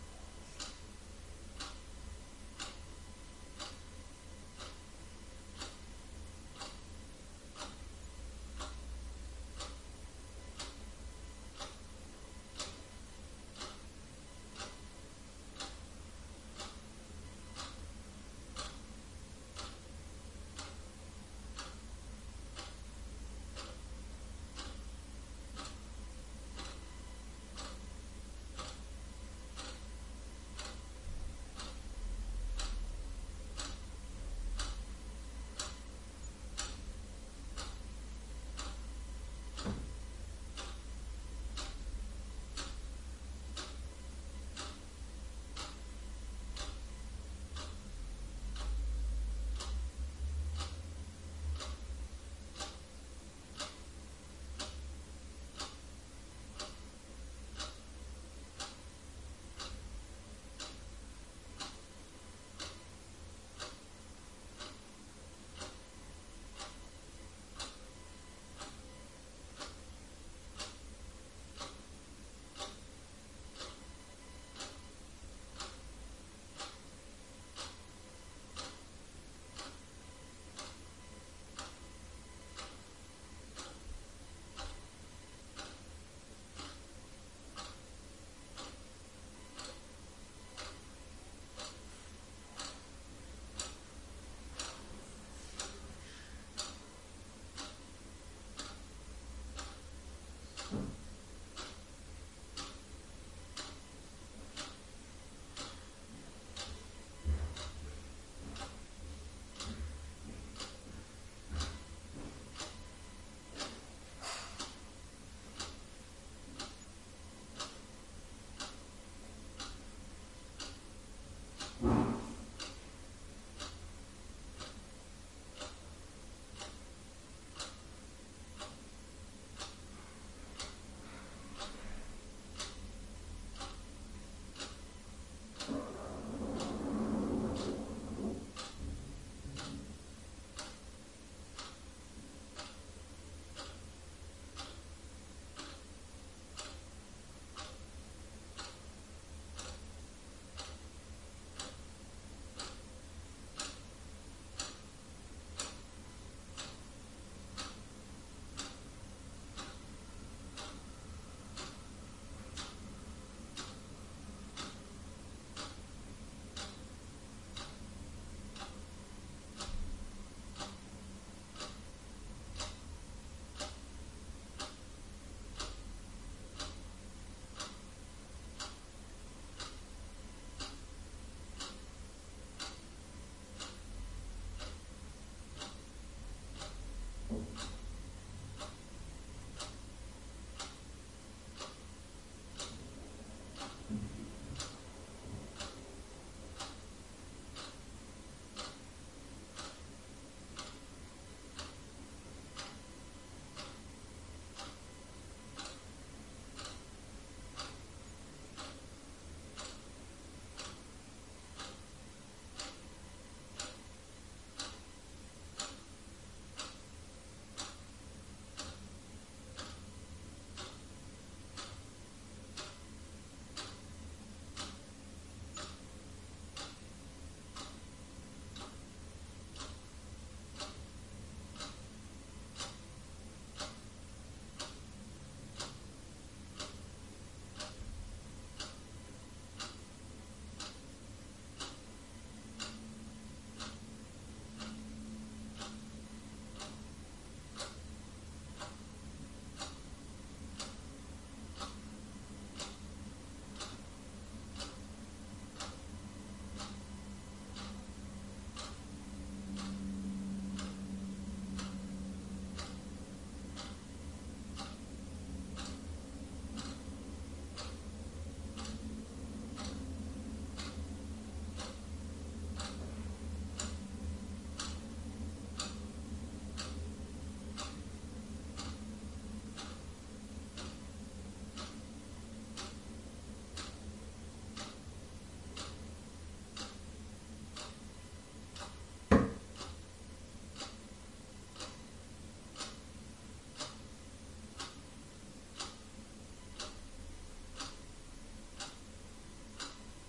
5min-kleinerRaum-lauteUhr
5 minutes in a small room within a load clock is ticking. Somewhere in the middle there is a soft crack.
watch, ticking, indoor, quiet, clock